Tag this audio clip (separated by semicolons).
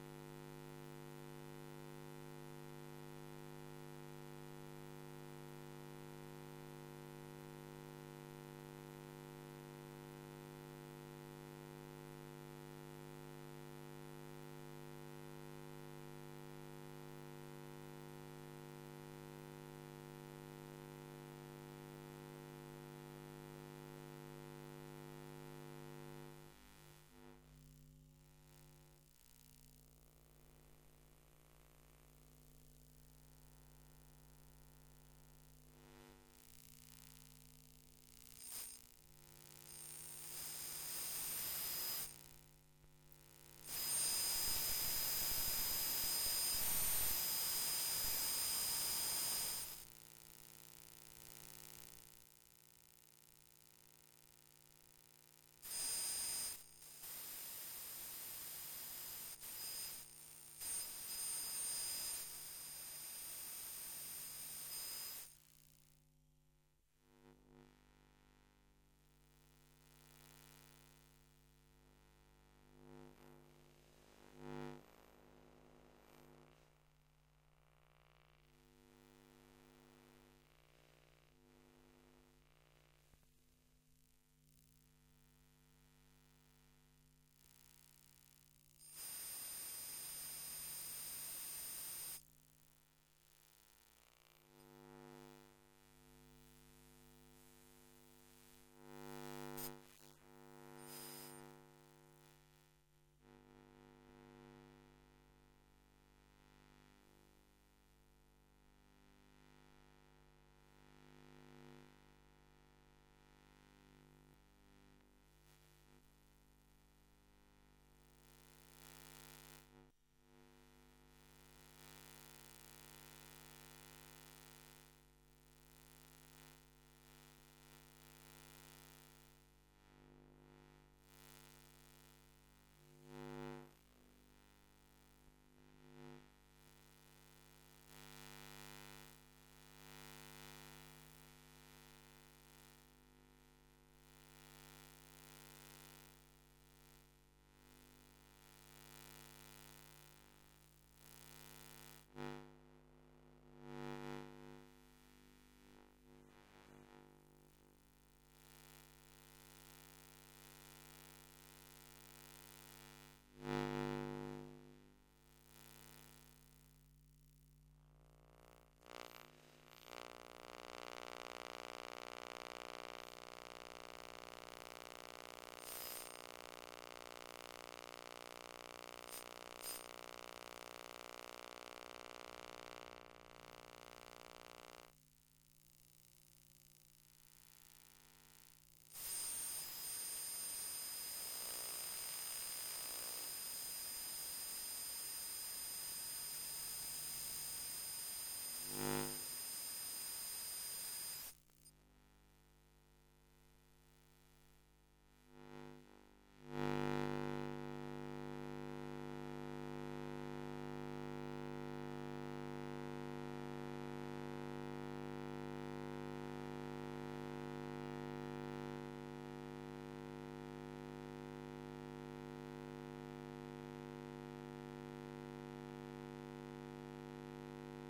electronics; EMF; glitches; interference; noise; onesoundperday2018; power; recording; static; technology; zaps